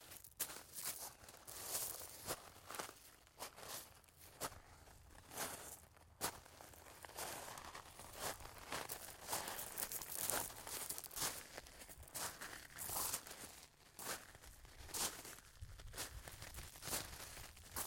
walking on pebbles
This is the sound of me walking on the small pebbles that surround my kid's playscape.
Recorded with a Tascam DR-40, AT-875R mic and love.